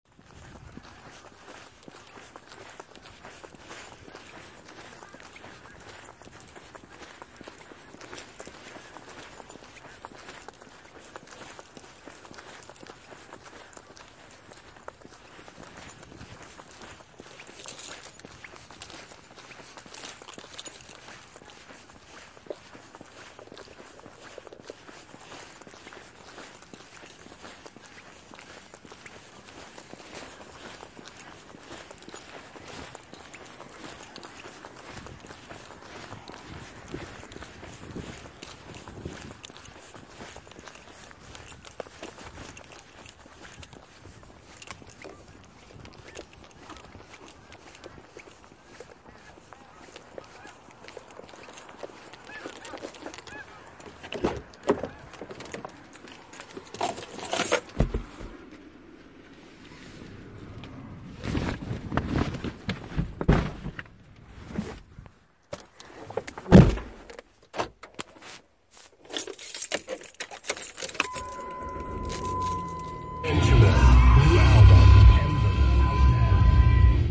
walkingtocar.soundclip
Walking through a parking lot to car, getting in car, and then starting car.
car, field-recording, footsteps, keys